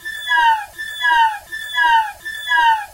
Looped elements from raw recording of doodling on a violin with a noisy laptop and cool edit 96. Another variation on the psycho theme sounds like passing race cars or an alien car alarm or the last 3 seconds of an intergalactic love scene.